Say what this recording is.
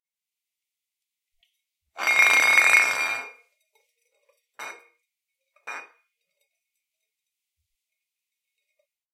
Spinning a Bottle
Spinning bottle: Rattling glass, spinning on a tile floor.